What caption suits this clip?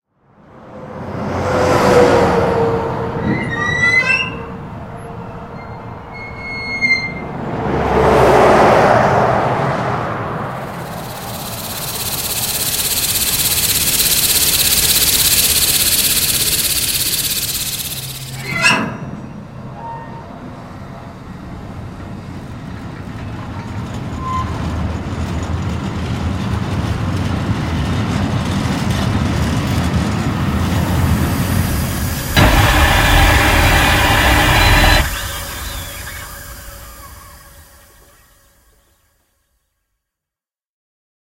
#5 Once upon a time in 'De Kanaalzone'
A mixture of transportation sounds: a truck driving by, a diesel locomotive coming to a halt, gas escaping from a valve, mechanical rattles...
rattle, Harbor, squeak, train, truck, traffic, transport